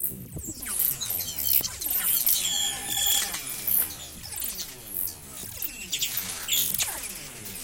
reinsamba Nightingale song hitech-busychatting-rwrk
reinsamba made. the birdsong was slowdown, sliced, edited, reverbered and processed with and a soft touch of tape delay.
animal, spring, tape, electro, birdsong, dub, echo, hi-tech, elektro, nightingale, glitch, reverb, reggae, space, score, soundesign, processing, idm, ambient, bird, effect, funny, natural, fx, happy, filters, electronic, delay